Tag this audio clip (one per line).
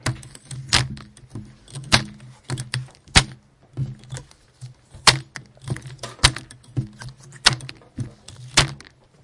Rennes
CityRings